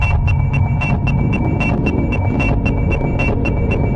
beeping loop
A strange loop with a rhythmic beeping sound with a noisy background noise. Made with by granular synthesis.
granular, processed, synth, loop, noise, beep